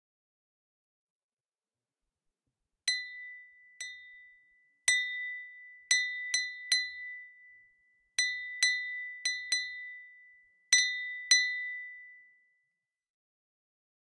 6-Clinking champagne glass
Clinking champagne glass
champagne, panska